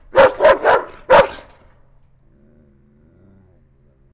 Dog Bark Agressive
Mad barking dog.
agressive, angry, Bark, barking, Dog, growl, growling, hissing, mad, upset